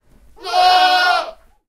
goat choir - minor third- two goats bleating simultaneously
Two young goat bleating simultaneously in a stable of a city-farm of The Hague. By chance (or not) they are almost singing a minor third interval.
Audio extracted from a larger recording of these two goats:
Recorded with a zoom h1n.